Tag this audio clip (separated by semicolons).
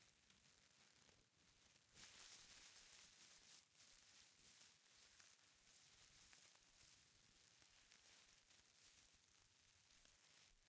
Crumple; Crumpling; Paper